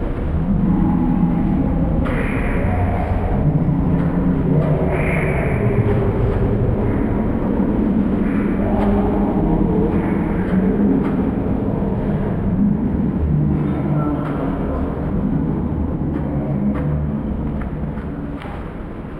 H002 haunted liontamer
Sounds for a horror ride or haunted house.
dark, ghosts, halloween, haunted-sounds, scary